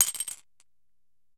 marbles - 15cm ceramic bowl - drop into bowl full of ~13mm marbles - 1 ~13mm marble 04
Dropping an approximately 13mm diameter marble into a 15cm diameter bowl full of other ~13mm marbles.
ceramic, marbles, drop, impact, ceramic-bowl, glass, dropped, glass-marble, marble, dropping, bowl, dish